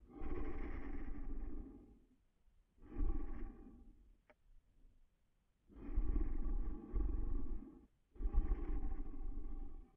Stöhnen DunkelnAngsmachend
Lightweight snorting good for night or stories etc